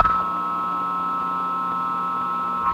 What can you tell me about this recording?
Short radio 'burst'. Somewhat noisy and perhaps useful as percussion.